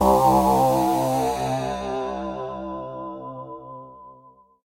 Volca FM Sound2
Korg Volca FM
FM, sound, synthesizer